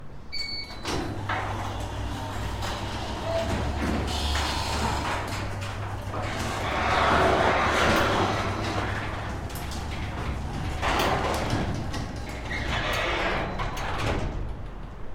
Old Garage Door Closing [Outside]
garage door opening - recorded from outside